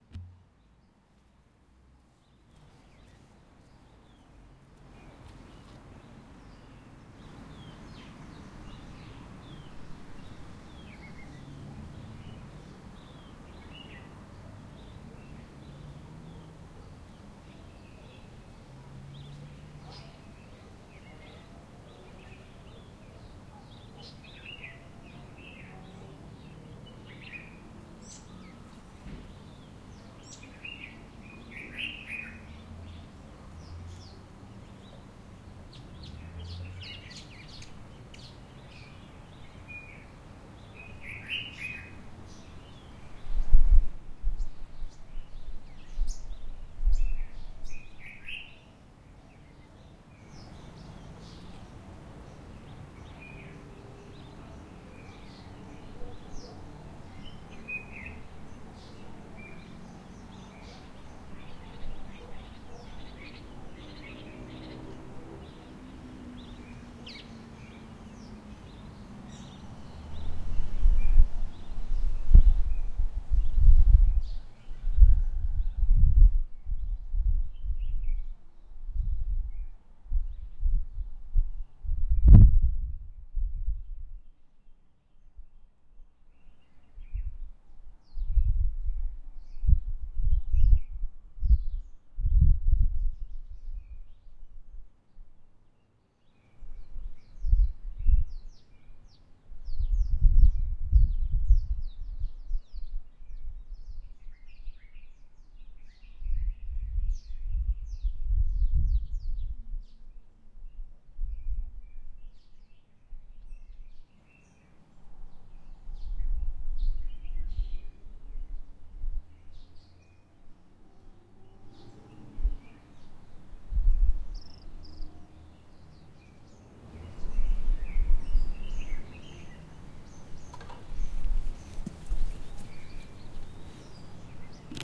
Suburban garden ambience in the summer